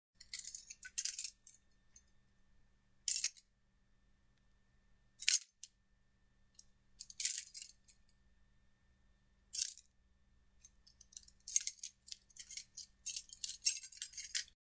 playing with my keys
giving, keys, playing-with-keys, grab-keys, give-keys, grabbing